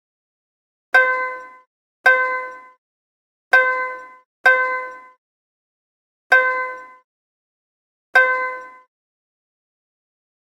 Some plucks with old zither instrument recorded at home, retuned in Ableton.
rodentg3, home-recording, Zither